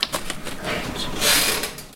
industrial paper process
robot, mechanical, industrial, factory, noise, machine, stomp, machinery, ignition